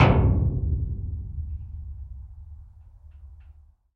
Low Metal Thud 2
Low-frequency and mid-frequency thud against metal, high-frequency click against metal.
Designed sound effect.
Recording made with a contact microphone.
bass, contact, hit, hitting, impact, low, metal, metallic, microphone, steel, sub-bass, thud, thudding